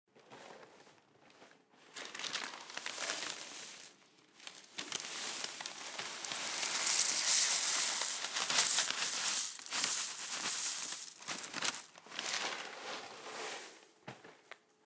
Cereales-Versees dans unbol
Putting cereals in a bowl recorded on DAT (Tascam DAP-1) with a Rode NT4 by G de Courtivron.
cereals, corn, flakes